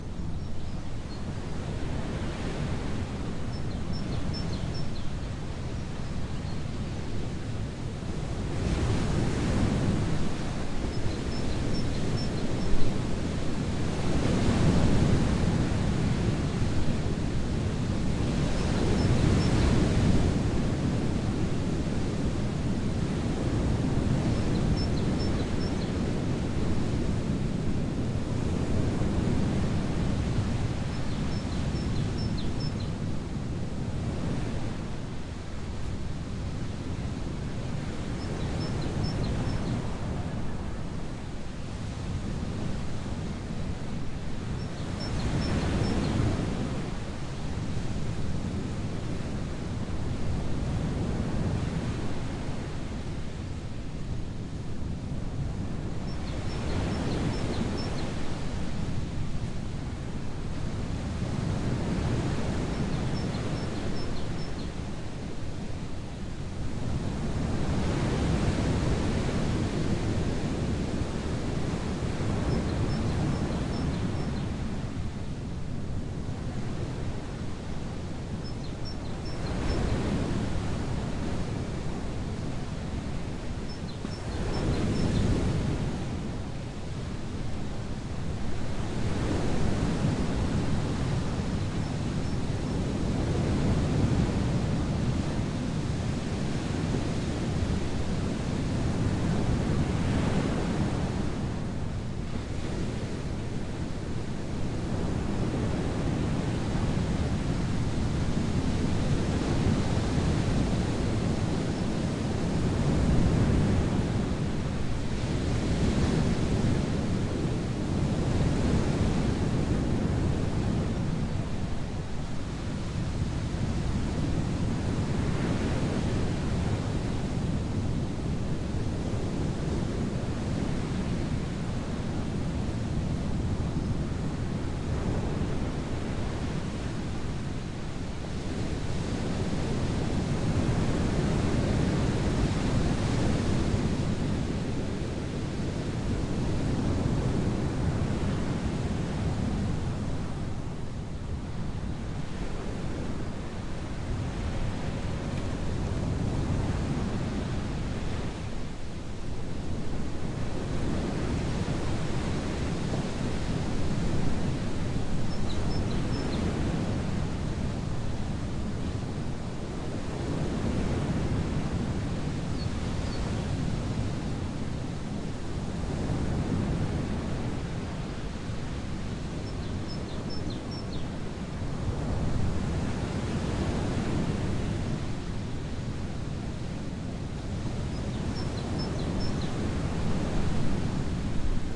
Waves, big. 50m distance. Bird
Big waves at 50m distance
Greece, Sea, Beach, Waves